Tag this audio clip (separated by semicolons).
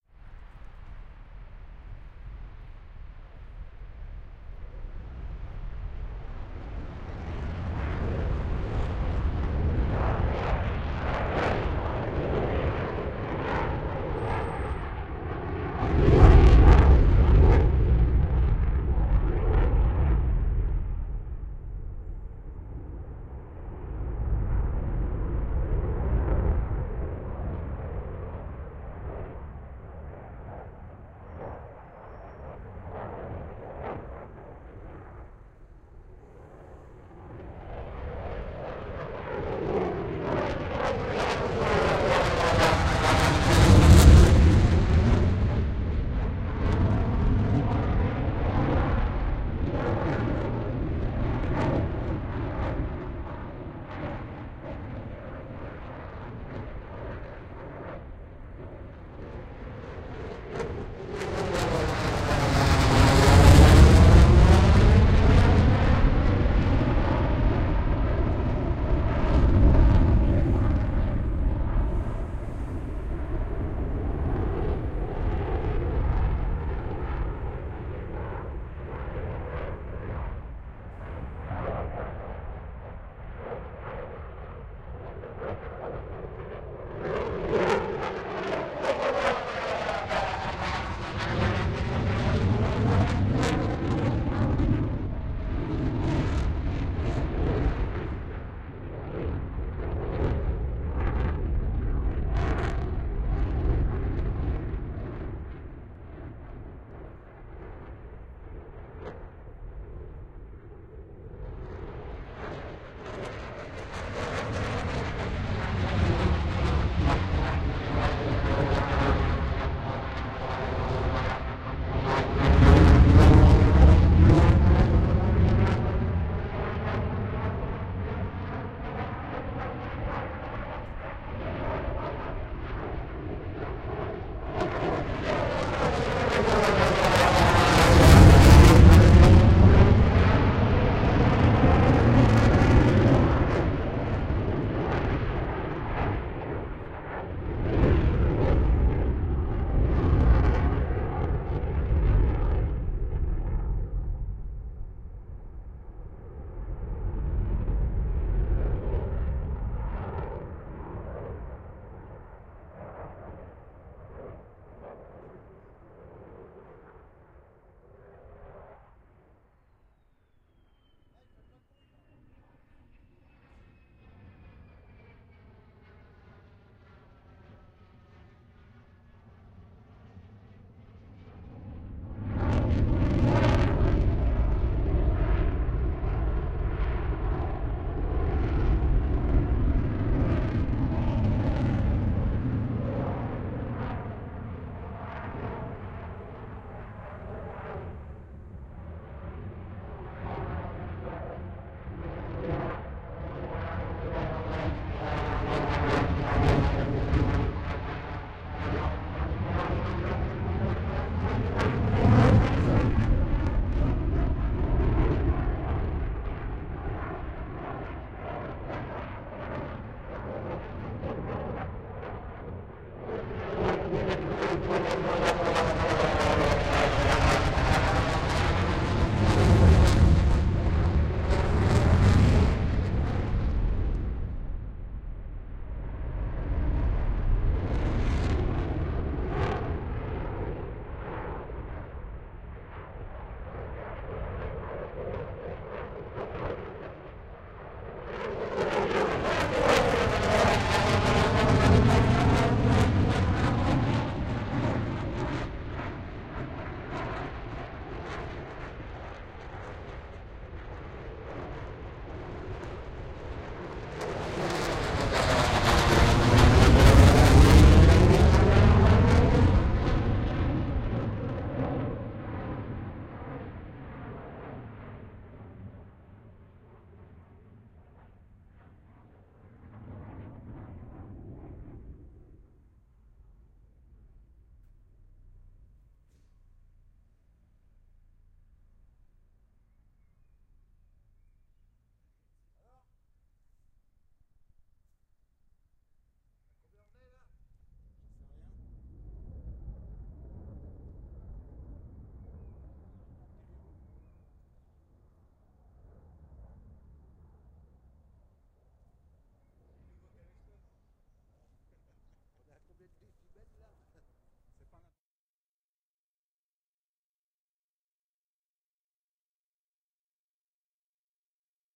field; recording